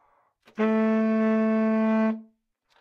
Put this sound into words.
Sax Baritone - A3 - bad-richness
Part of the Good-sounds dataset of monophonic instrumental sounds.
instrument::sax_baritone
note::A
octave::3
midi note::45
good-sounds-id::5514
Intentionally played as an example of bad-richness
baritone, good-sounds, neumann-U87, sax